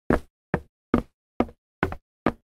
Six fairly light footsteps on a plank with hiking boots. The steps have a hollow sort of sound to them. Each step is separated by ~250 ms of silence.
Recorded with a H4n Pro on 06/06/2020.
Edited with Audacity.